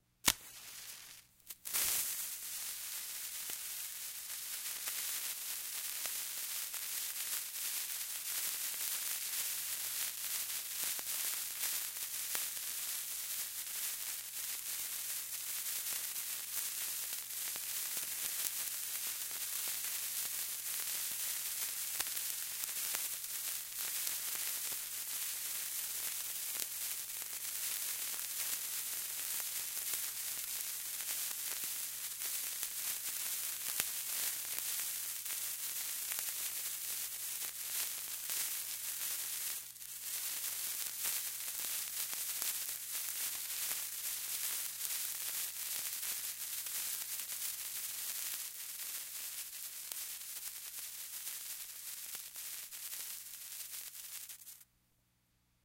Stereo recording of a birthday sparkler. Starts with a match being struck. Very close up. Recorded on a Sony TCD-D3 DAT with a Sennheiser MKE 2002 binaural mic.
environmental-sounds-research, close, sparkler, sizzle, sparks, lit, fuse, electrical, burn